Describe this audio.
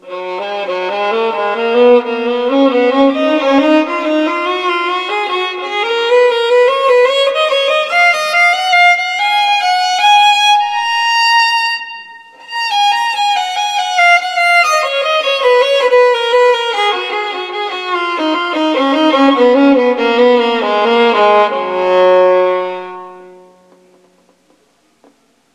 Baroque Phrases on Violin. Improvising on the Whole G Major Scale going Up and Down.